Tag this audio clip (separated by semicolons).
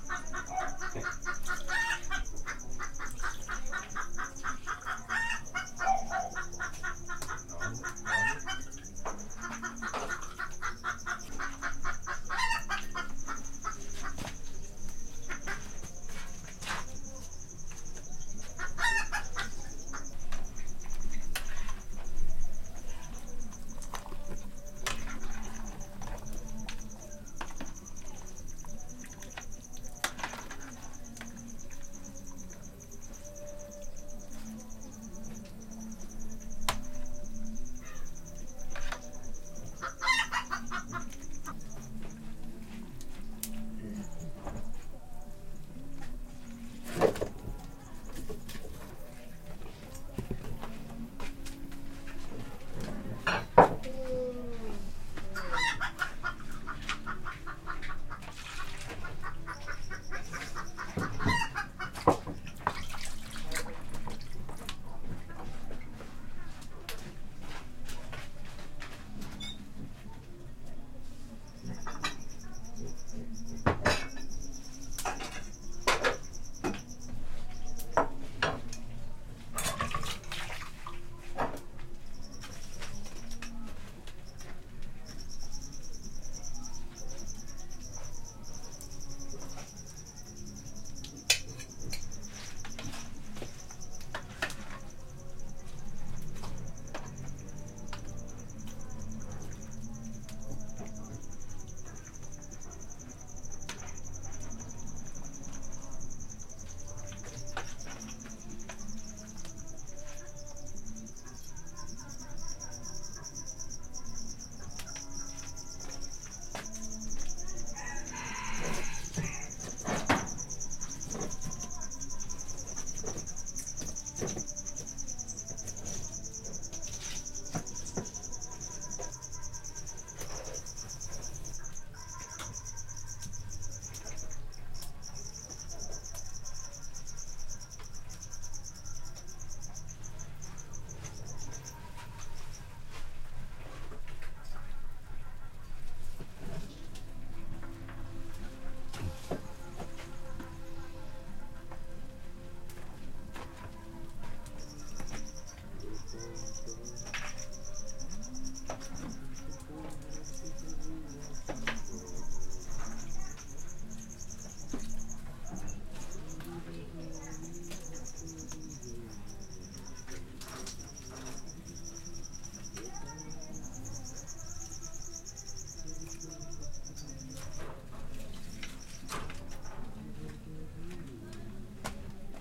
ambience; chicken; cock; countryside; cricket; dishes; field-recording; flip-flop; Hi-Fi; house; human; kitchen; man; nicaragua; rural; singing; steps; voices; water; wooden